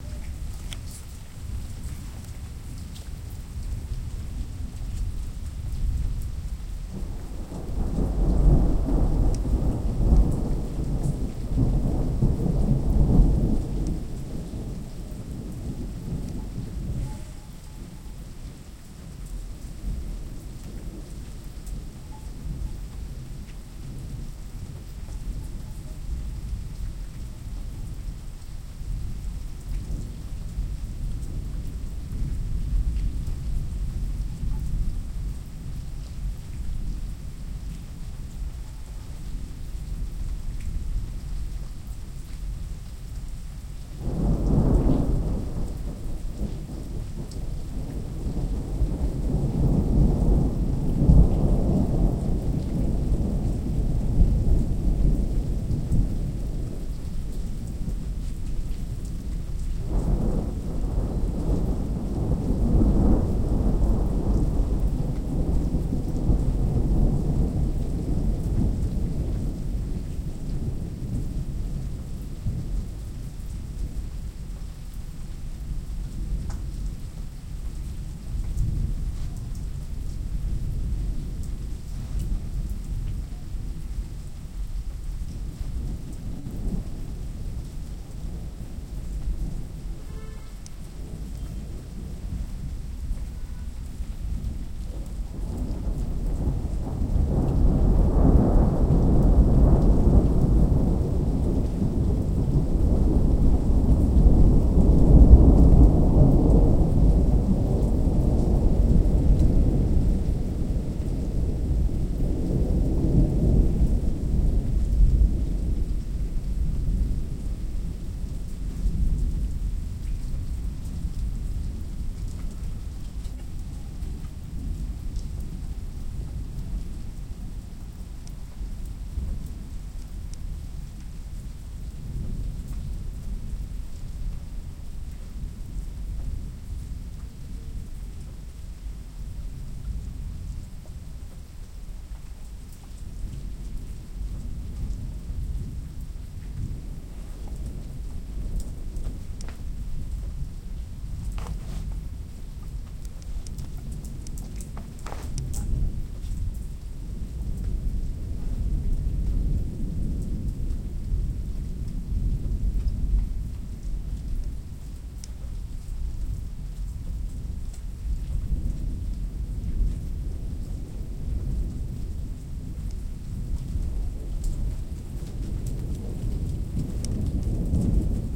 distant storm 1
Distant thunder storm. Gentle rain and non-stop thunders. Recorded on Marantz PMD 661 MKII built in stereo mics.
rain, thunder-storm, tormenta, ambient, distant, ambiance, thunderstorm, field-recording, nature, lightning, thunder